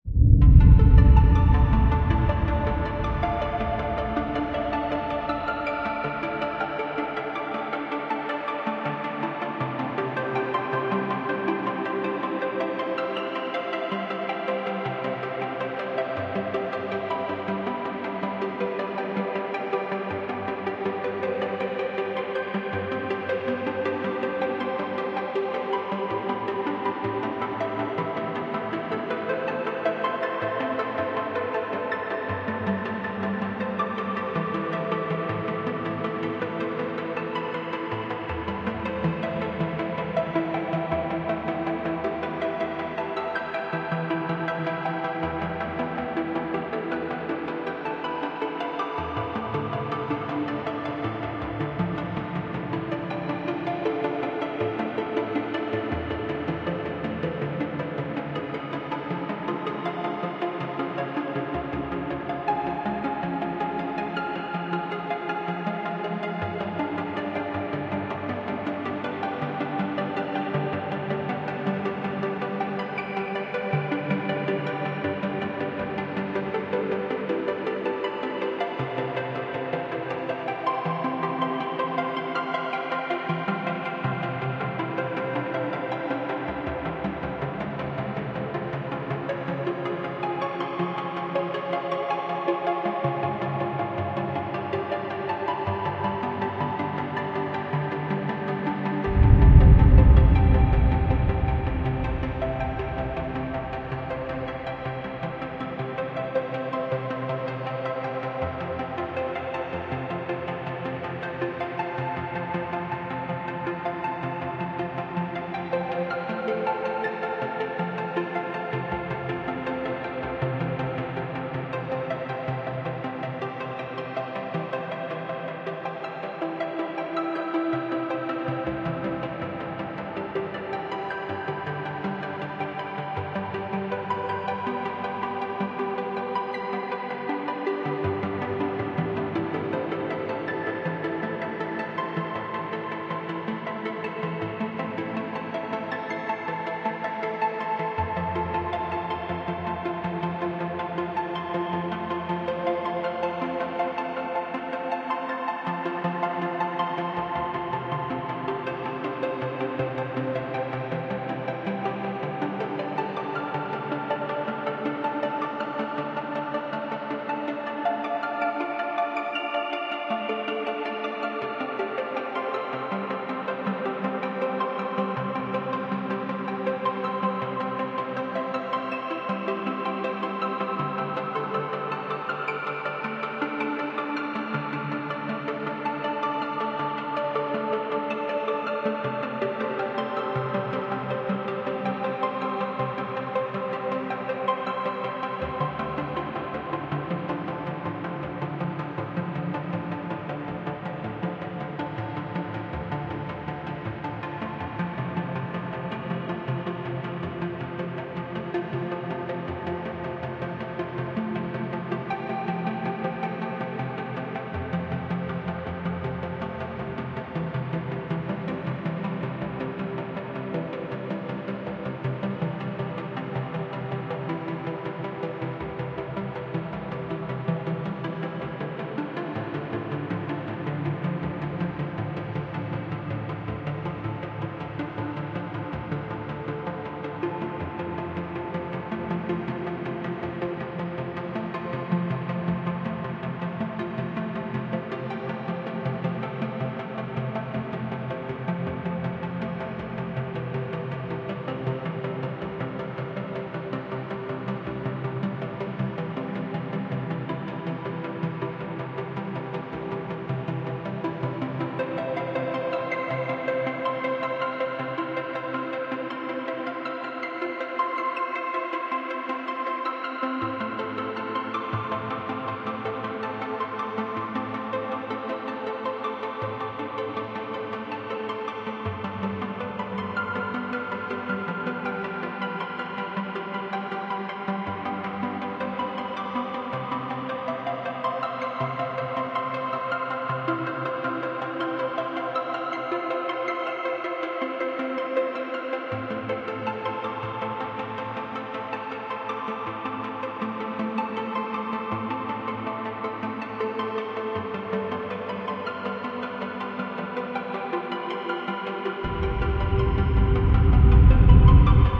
nice music played on keyboard as it is FX Arpeggiator by kris klavenes 19.11.2021
Arpeggiator, keyboard, nice-music, kris-klavenes